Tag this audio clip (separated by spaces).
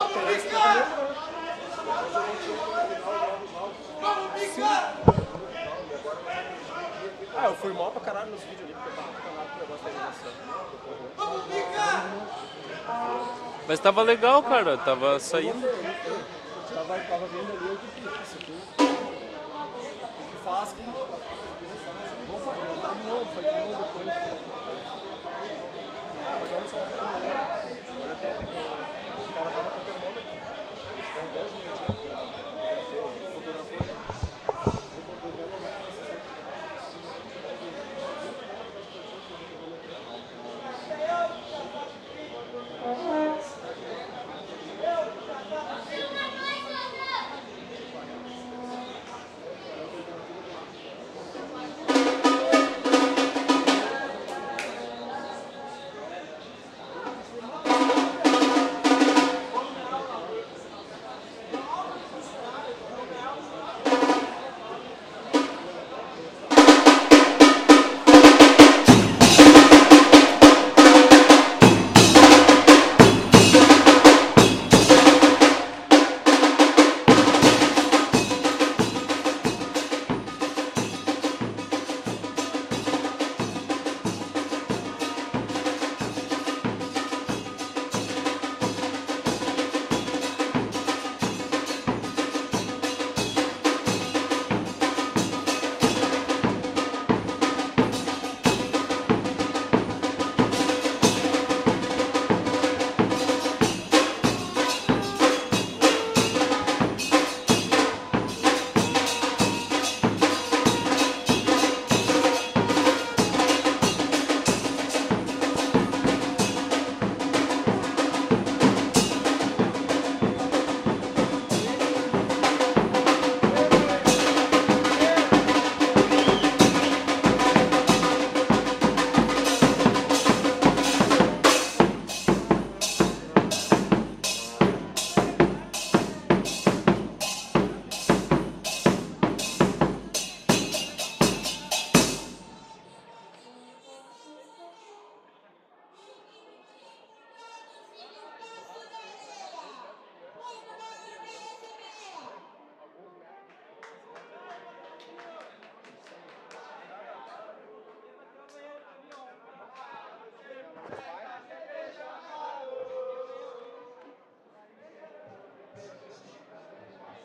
Field Recording Stadium